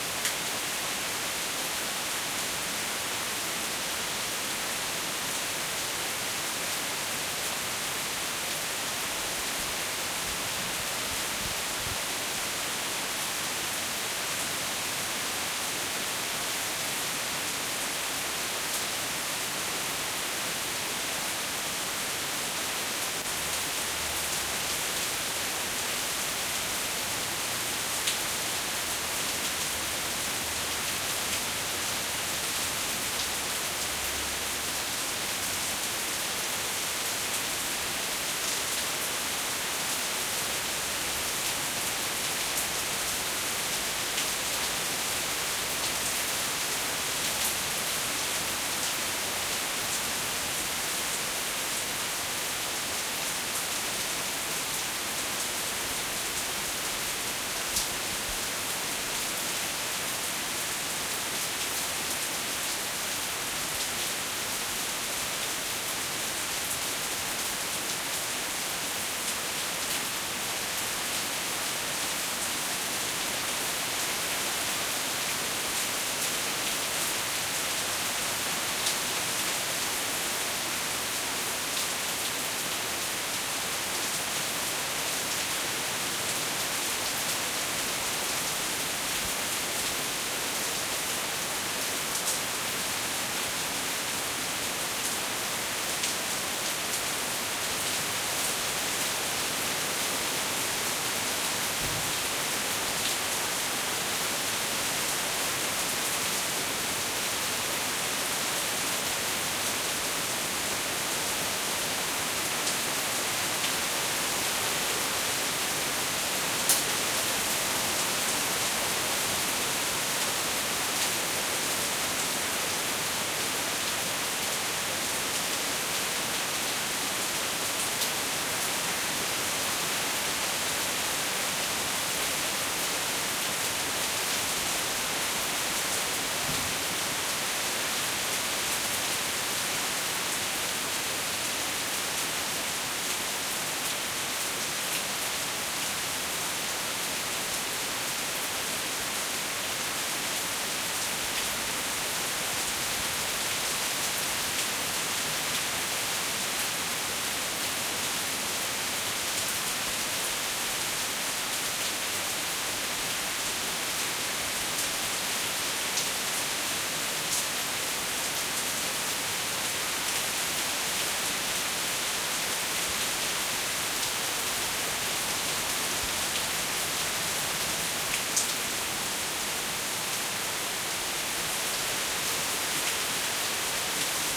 rain heavy porch crisp
heavy, porch, rain